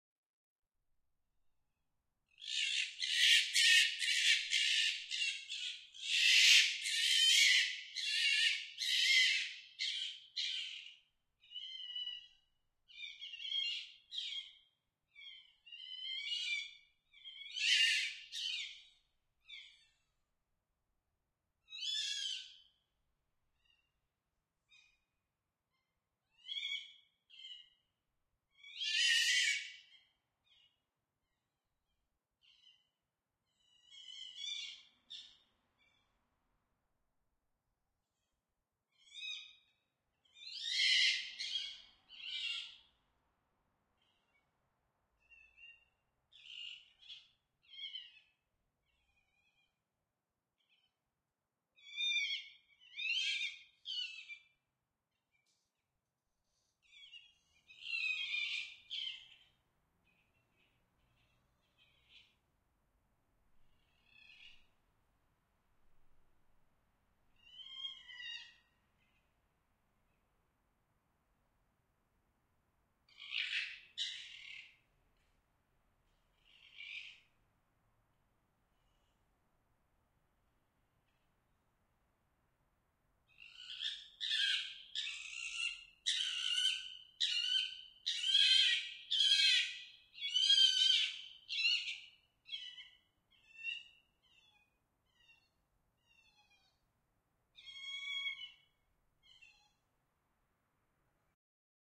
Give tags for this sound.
pain suffering creature nature sounds animal call torture cry agony abuse slaughter fox

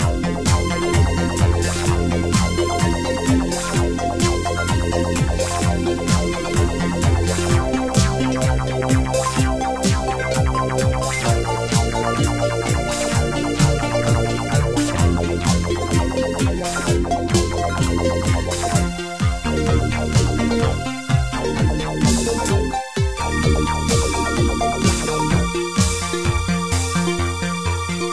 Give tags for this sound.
loop Synth electronic techno

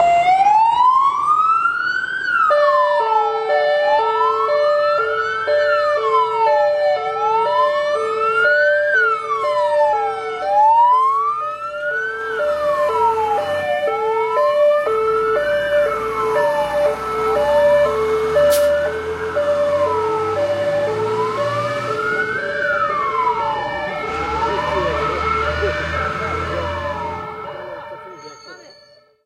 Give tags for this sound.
alarm brigade danger effect field-recording fire firefighter fire-station flame fx remiza sfx siren sound station truck

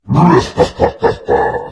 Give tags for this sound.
cartoon hahahaha monster

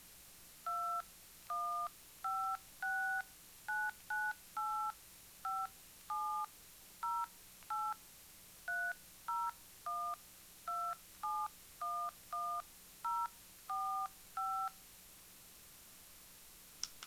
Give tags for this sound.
cell beeping numbers dialing phone

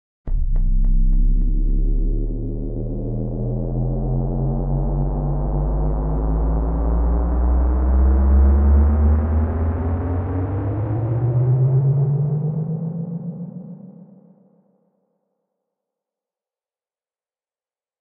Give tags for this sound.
electronic freaky sound-design mechanical sfx futuristic weird robot effect science fiction growl noise sci-fi synth soundeffect strange machine torment angry future sounddesign scary abstract tortured fx apocalyptic digital glitch scream

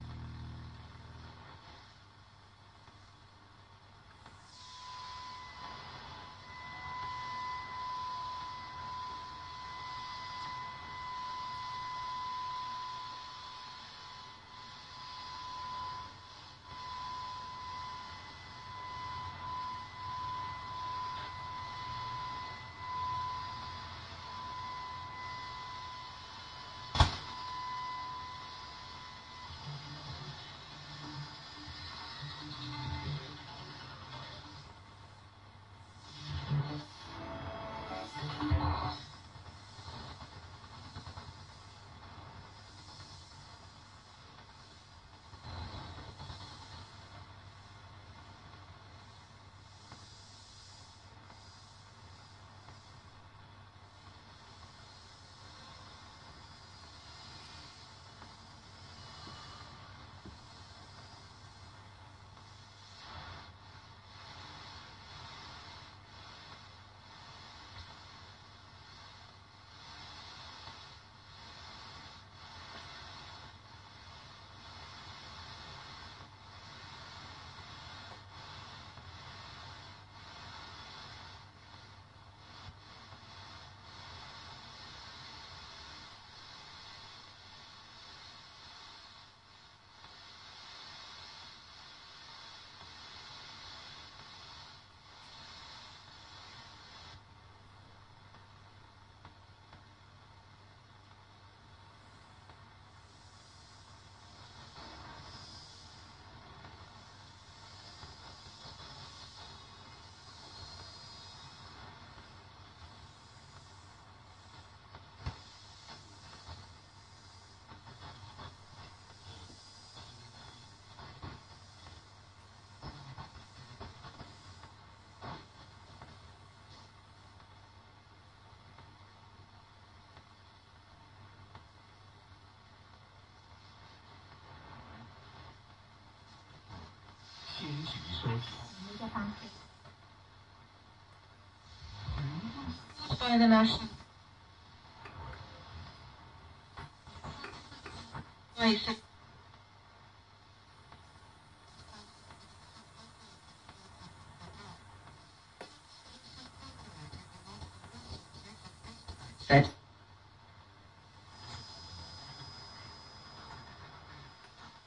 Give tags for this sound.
noise radio longwave shortwave interference dead tube air

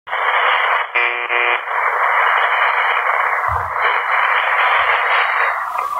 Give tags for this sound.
ambient atmosphere background-sound distorsion effect frequency interference noise phone

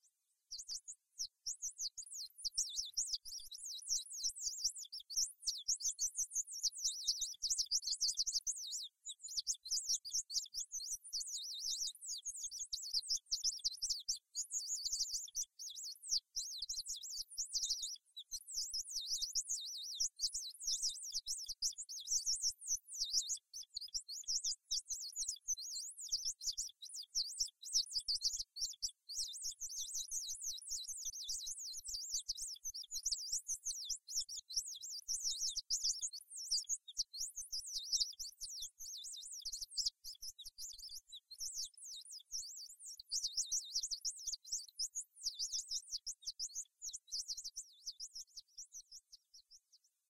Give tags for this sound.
Nature Birds Song Wildlife Sounds Tweeting Bird Singing Natural

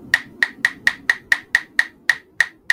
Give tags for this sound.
plastic rub beat

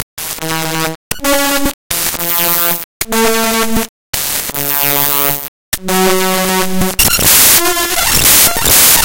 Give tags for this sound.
clicks glitches raw

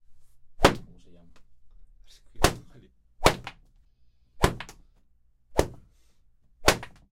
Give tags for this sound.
foley whip

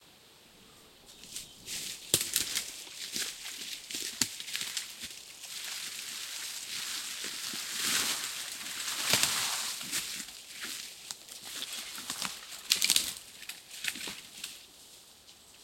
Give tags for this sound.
leaves pruning tree

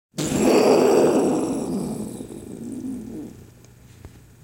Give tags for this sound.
electric field-recording tunder

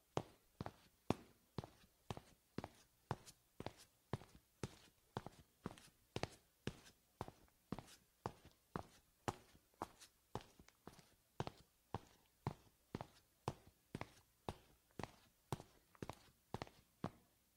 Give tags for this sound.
tennis medium kitchen male shoe walk footsteps walking sneakers tile shoes linoleum

